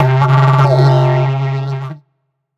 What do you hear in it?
short didgeridoo "shot" with some reverb added. enjoy.